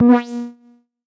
Transition sound from one screen or menu to another, could be used for game sounds.